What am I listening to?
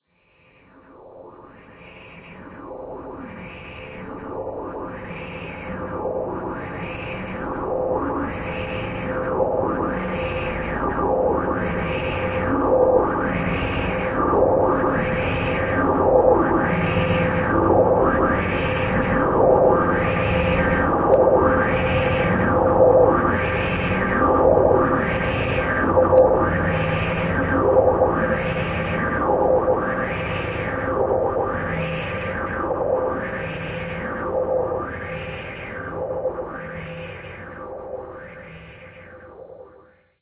My daughter and I scratched a fence with skewers and then processed this into oblivion.